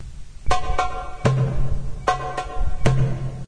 Quddam Msarref Rhythm
Two cells of Quddám msarref (light) rhythm of the moroccan andalusian music